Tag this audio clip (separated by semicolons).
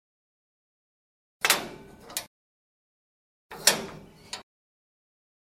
closing-door; door; lock; lock-metal-door; metal-door; metal-door-shut